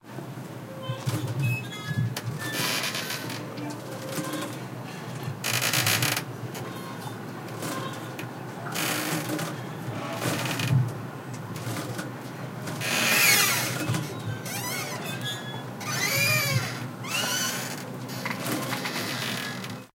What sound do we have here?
boat on the dock
Boats are waving on the still water in the port of genova.
genova, noise, boat, port